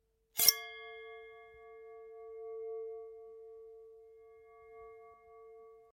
unsheathe sword anime like
unsheathe sword but Anime-like effect
anime, unsheathe, dreamlike, metal, samurai, knife, hit, desenvainar, medieval, impact, sword